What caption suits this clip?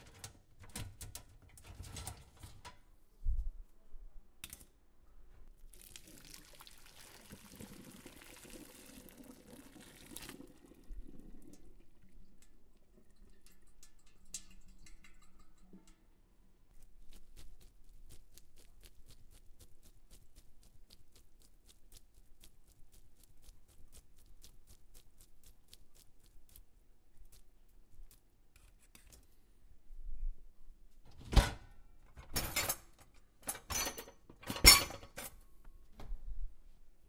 preparing cooked pasta